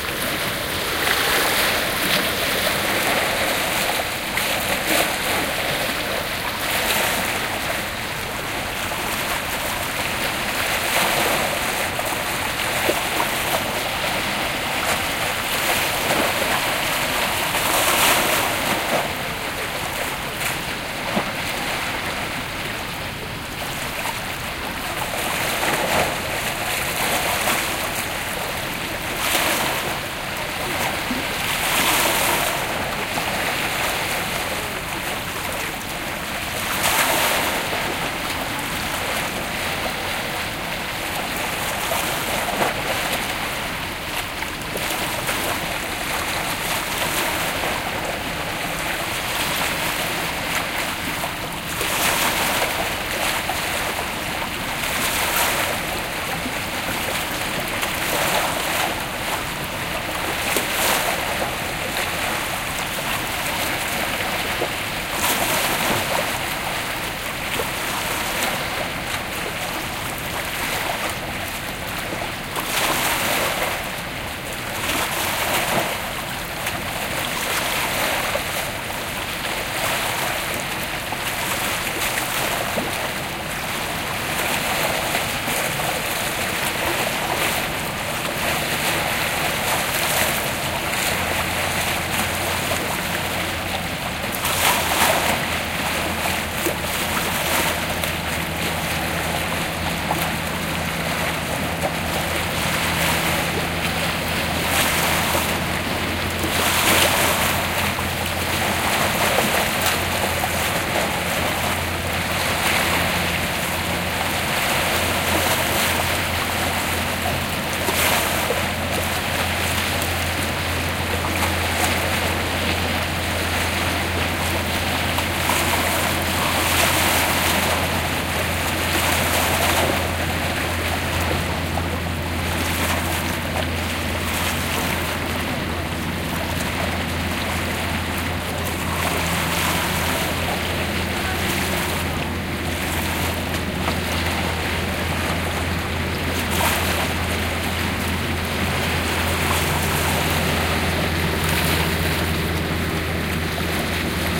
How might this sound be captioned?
A recording from the shore of the island Suomenlinna. Recorded during a calm evening.